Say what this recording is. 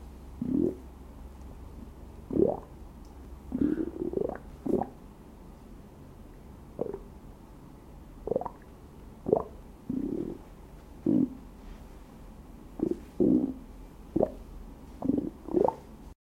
This is the sound of air pockets in between my organs croaking -- sounds like a frog or other swampy creature. It was recorded on a Rode Link lav.